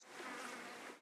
A fly in Tanzania recorded on DAT (Tascam DAP-1) with a Sennheiser ME66 by G de Courtivron.
fly, tanzania